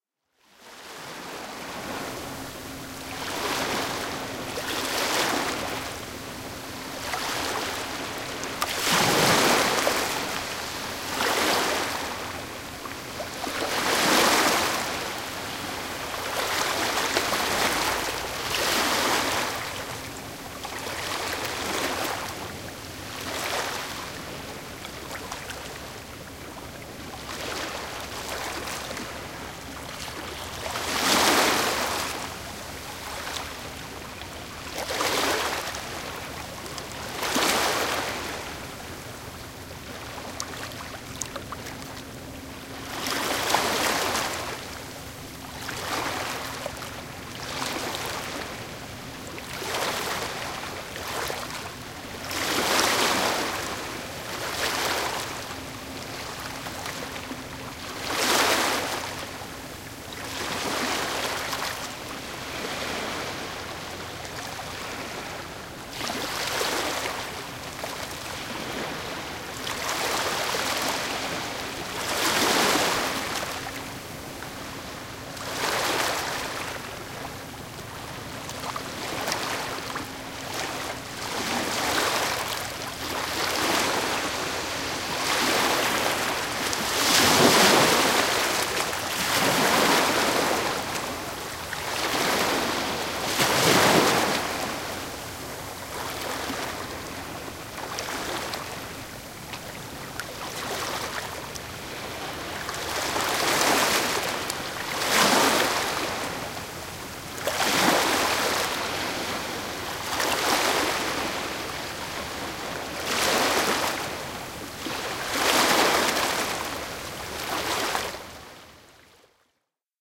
Close field recording of medium ambient waves crushing on the shore of Kalundborg Fjord at Røsnæs. Water cascading through small stones as it pulls back into the ocean. Very distant vessel. Recorded at 2 meters distance, 120 degree with Zoom H2 build in microphones mid February.
river, ambience, ocean, relaxing, h2, field-recording, soundscape, gurgling, denmark, stereo, shore, waves-crushing, zoom, seaside, kalundborg, water, waves, breaking-waves, bubbles, sea, gurgle, nature, ambient, beach